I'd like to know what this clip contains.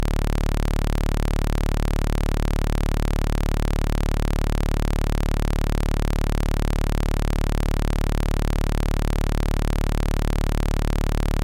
Doepfer A-110-1 VCO Saw - D1
Sample of the Doepfer A-110-1 sawtooth output.
Captured using a RME Babyface and Cubase.
A-100, analog, electronic, Eurorack, falling-slope, modular, multi-sample, negative, oscillator, raw, sample, sawtooth, slope, synthesizer, wave